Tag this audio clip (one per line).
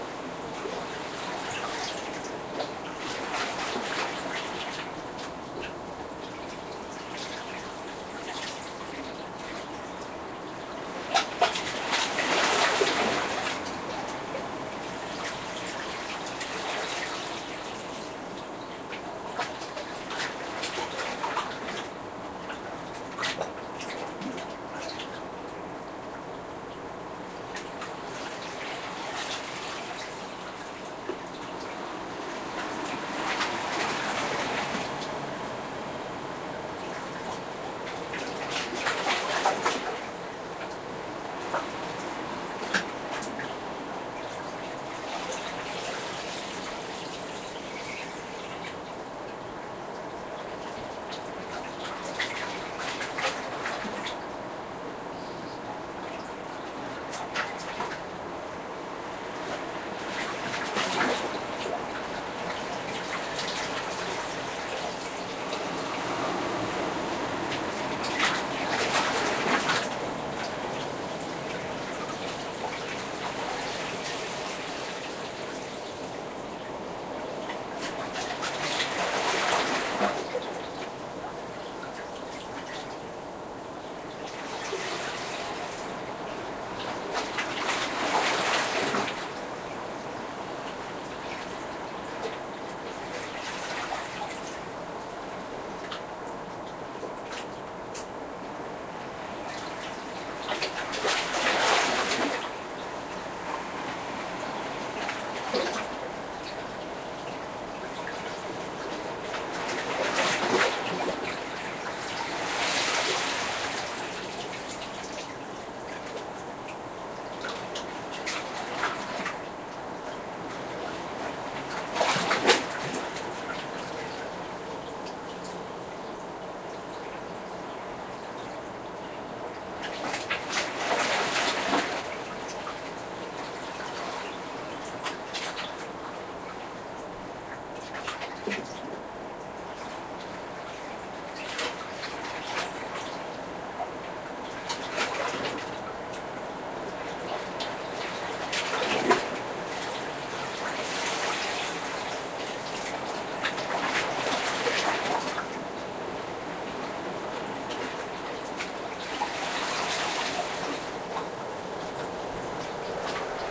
mar
ocean